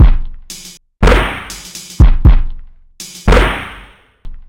finished loop
kit, loop, simple, slow